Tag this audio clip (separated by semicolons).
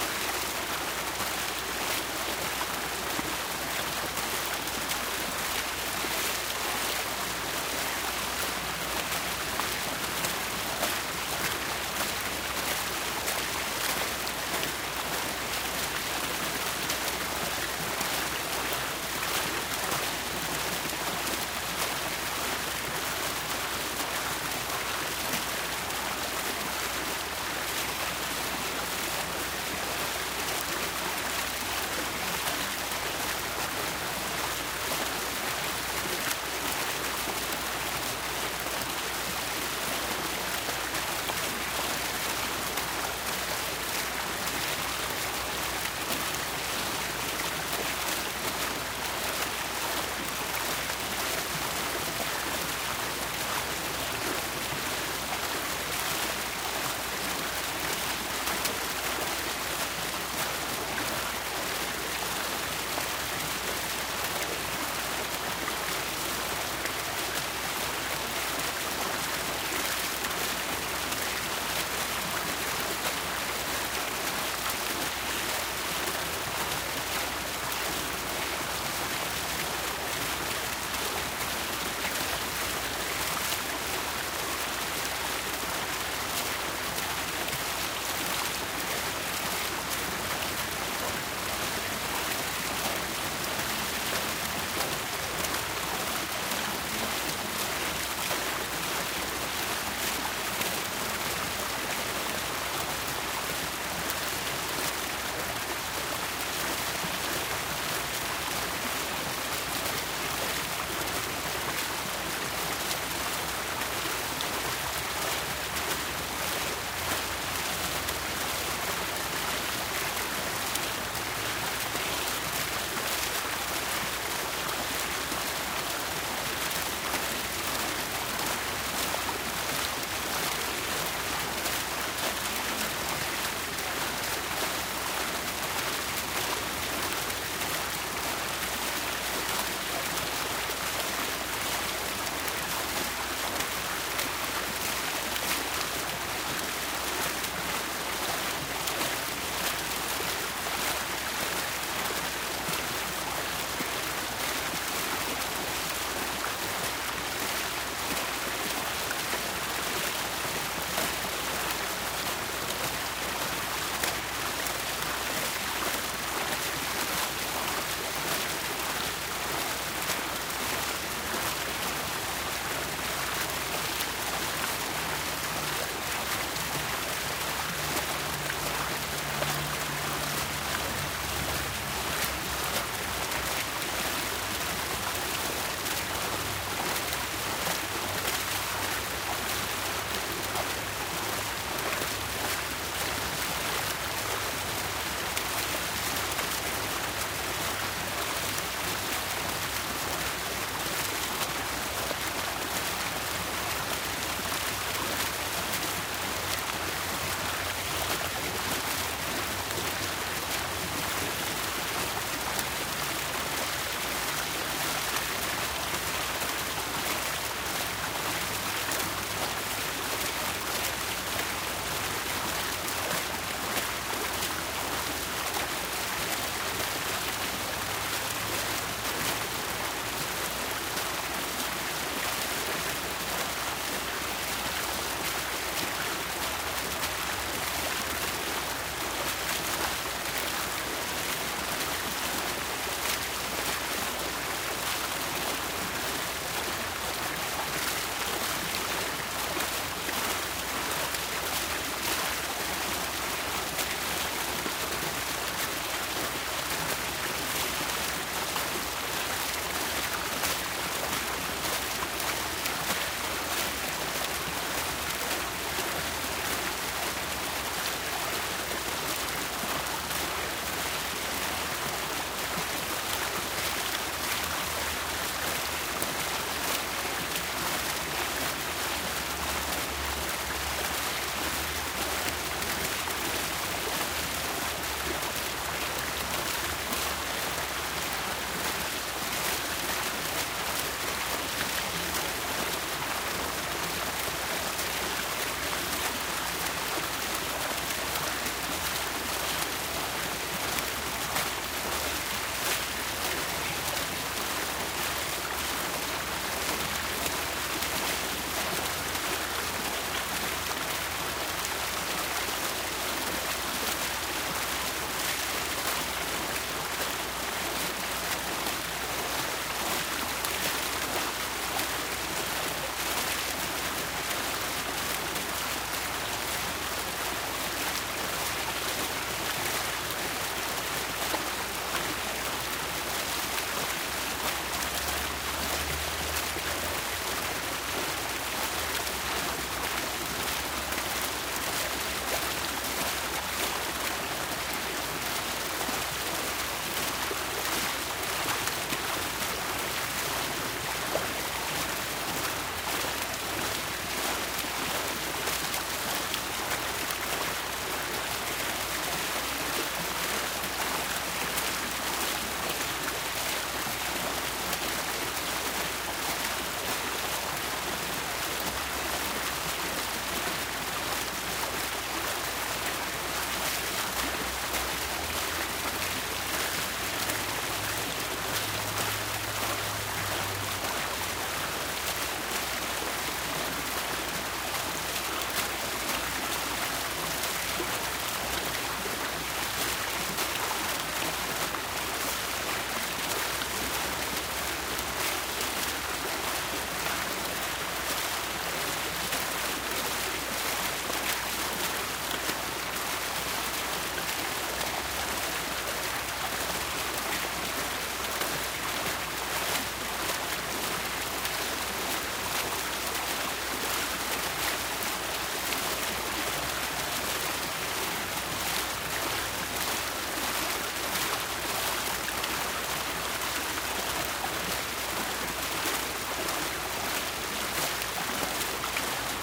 constant; park; splash; water; Water-fountain